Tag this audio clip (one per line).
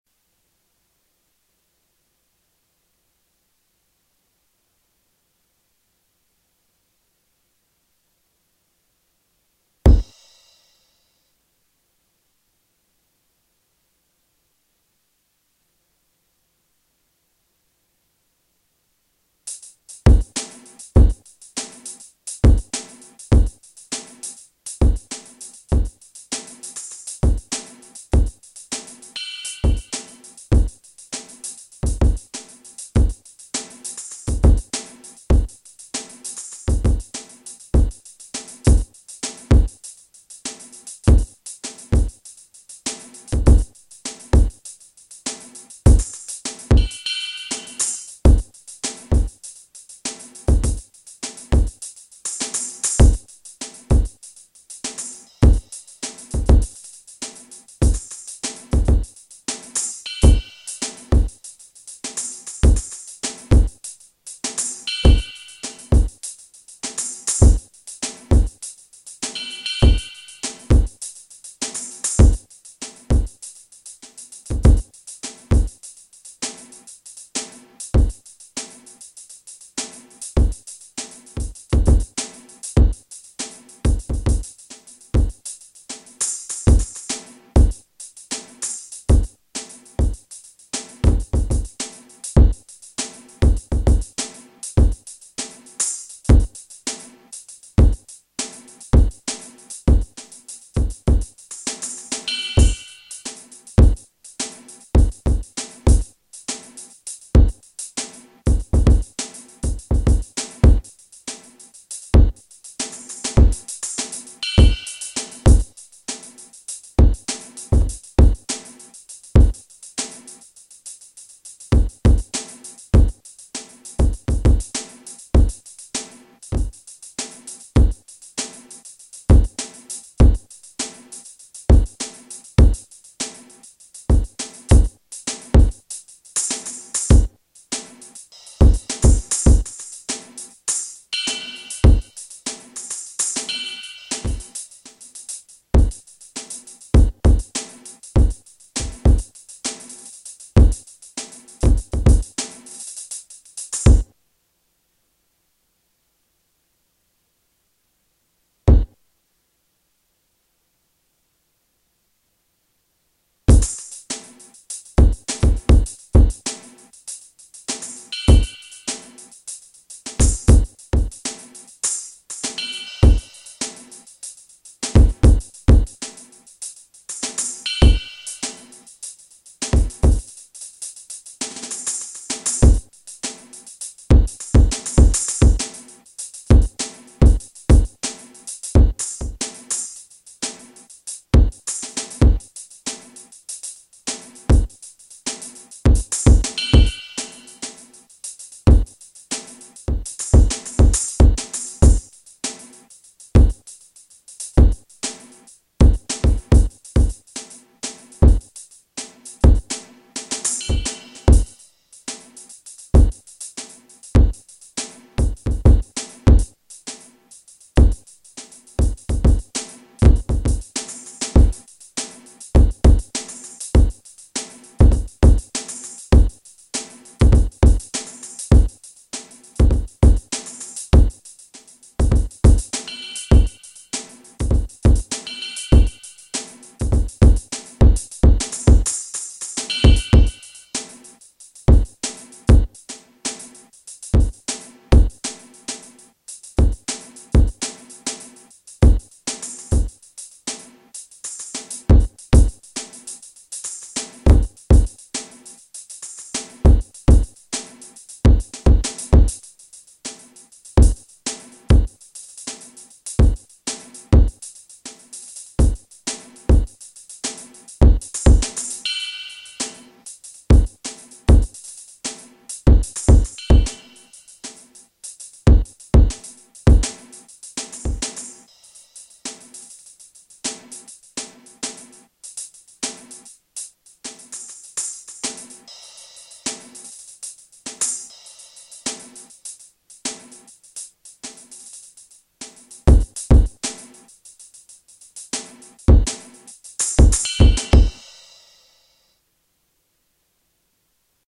funk
drum
free
shark
producer
samples
space
manikin
robot
time
jazz
london